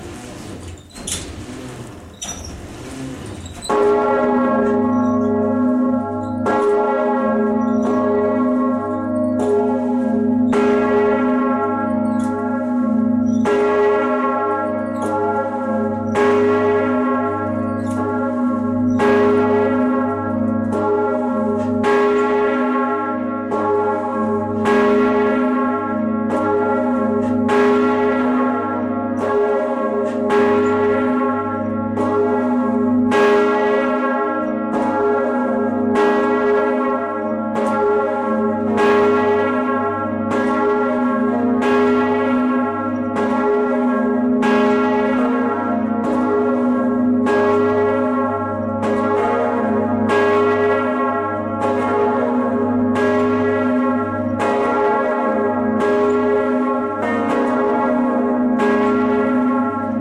La volée cloches d'Amiens Cathedral france
this is the sound of the bells at the amiens cathedral in france ,recorded with a blackberry voice note .